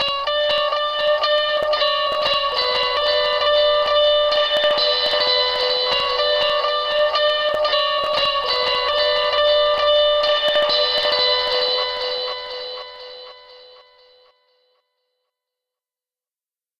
A surprisingly well-done solo. Somewhat good quality too.